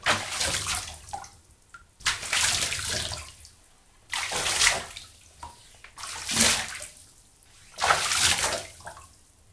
Splashes In A Bathtub
A person splashing water in the bathtub.
bath, bathtub, liquid, slosh, water